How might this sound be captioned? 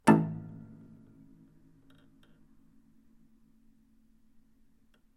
A -2oct muted creaky mechanics
Recorded in living room using a AKG C1000s mkIII
The piano hasn't been tuned in years and several of the mechanics are faulty.
Some artefacts may appear.
piano, Upright-piano, muted-strings